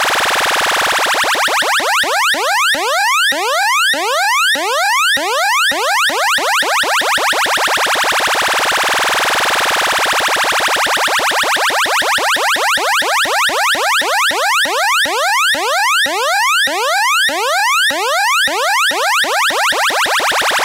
Cartoon-like siren recreated on a Roland System100 vintage modular synth